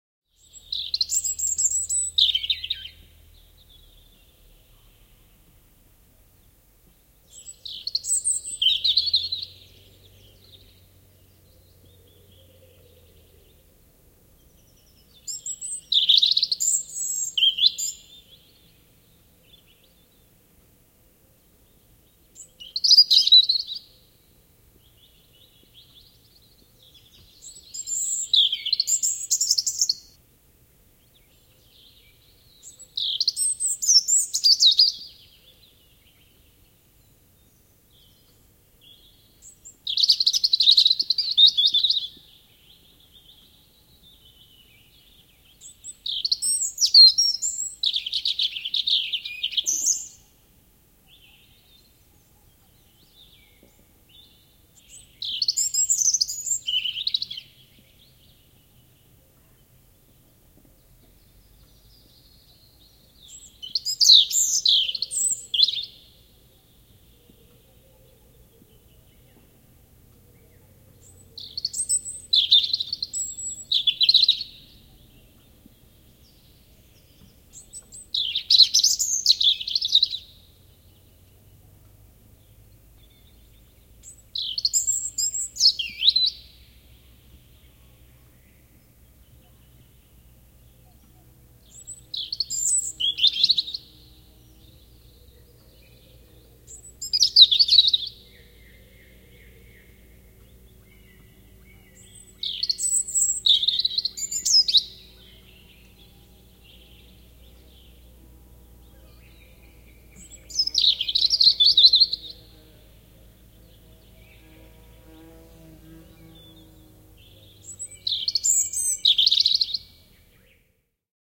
Punarinta, laulu / Robin, redbreast, clear song, singing late in the evening, some faint sounds in the bg (Erithacus rubecula)
Punarinnan kirkasta laulua myöhään illalla. Vaimeita ääniä taustalla. (Erithacus rubecula)
Paikka/Place: Suomi / Finland / Kitee, Kesälahti
Aika/Date: 15.05.2002
Resbreast, Linnut, Birds, Robin, Tehosteet, Suomi, Yle, Luonto, Soundfx, Nature, Punarinta, Linnunlaulu, Finland, Lintu, Finnish-Broadcasting-Company, Yleisradio, Bird, Field-recording, Birdsong